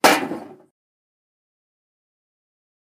Putting a cup on a steel table next to an iPod 5 microphone. Location: The Castle, Neutral Bay, close to the wharf, Sydney, Australia, 15/04/2017, 16:56 - 21:11.